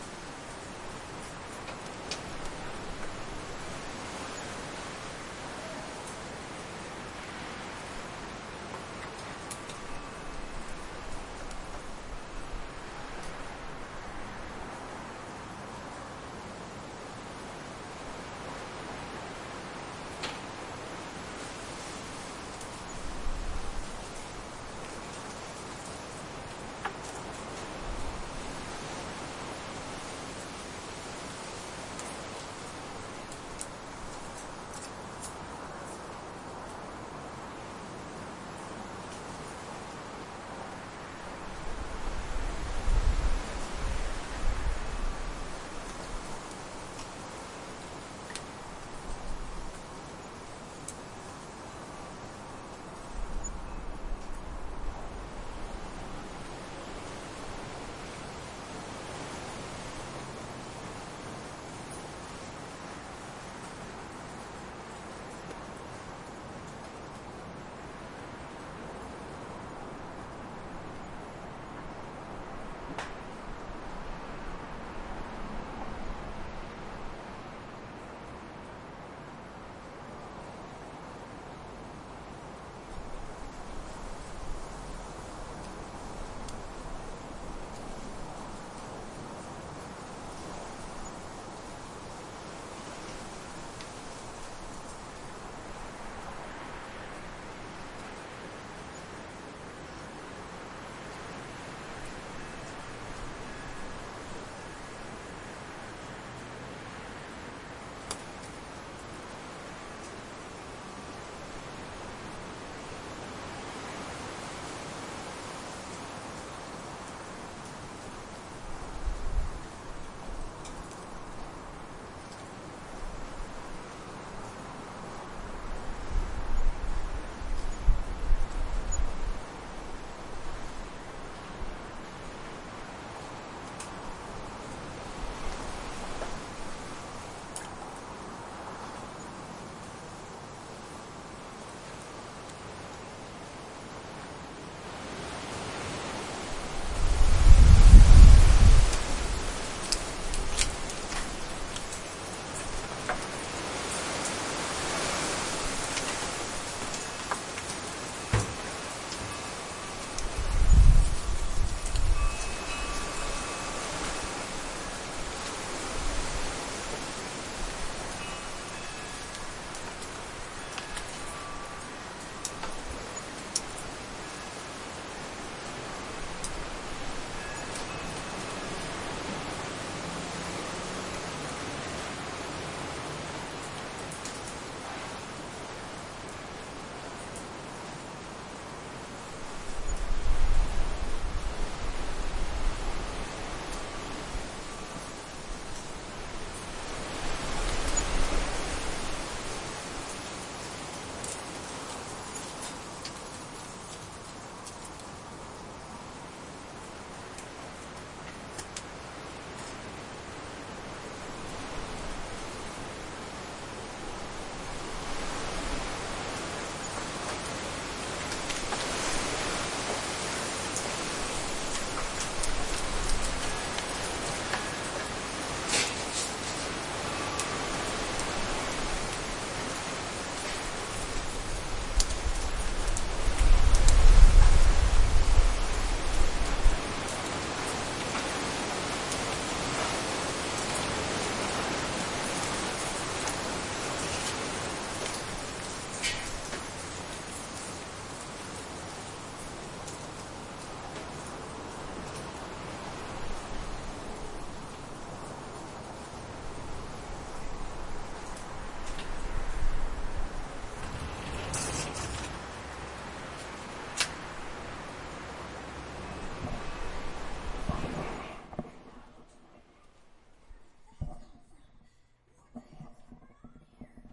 High Wind - Ambiance at Night
This is a recording from a 1 floor balcony during a windy night.
There are trees and other apartment buildings around.
time, general-noise, background-sound, ambiance, ambience, balcony, night, windy, neighborhood, wind, evening